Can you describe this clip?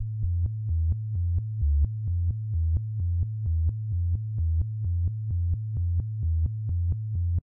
deep smooth bass loop
dark, bass